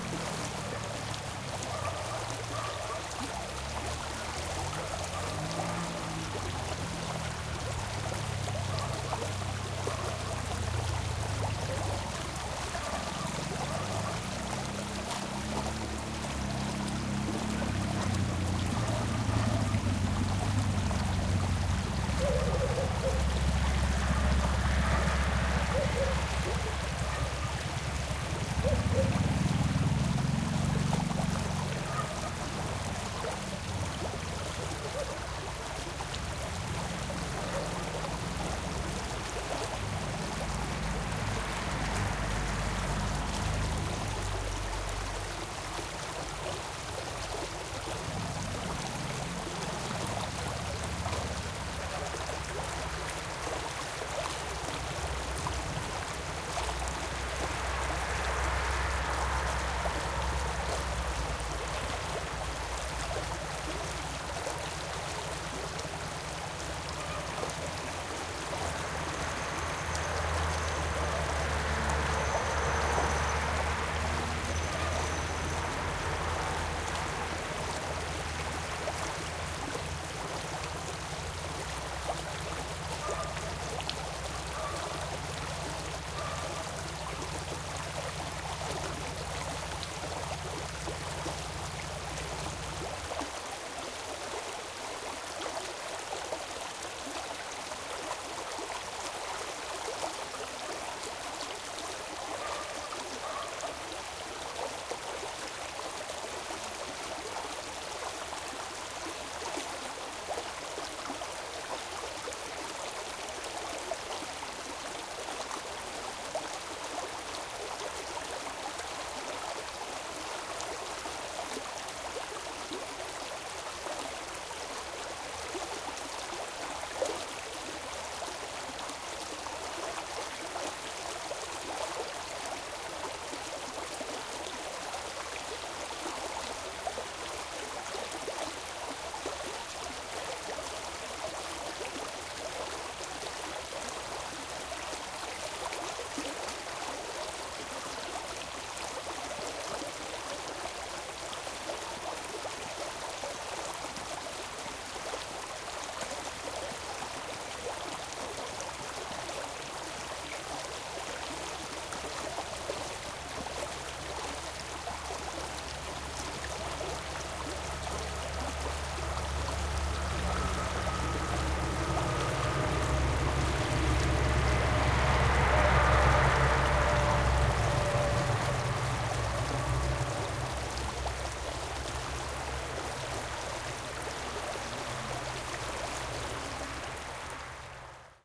Brook with village atmosphere
Countryside atmosphere. Brook and barking dogs. Motorcycle in a background. Recorded with Zoom H6 MS capsule.
brook, dogs, river, village